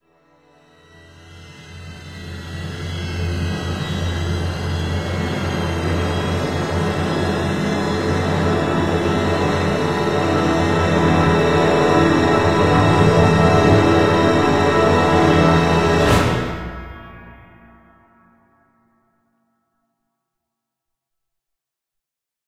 Robo Walk 05A
A giant robot taking a single step described using various instruments in a crescendo fashion.
Orchestral, Cluster